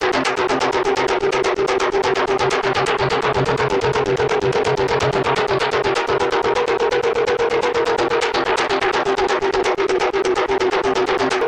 A sound sequence captured from different points of my physical model and different axes. Some post-processing (dynamic compression) may present.
synthesis, weird, finite-element-method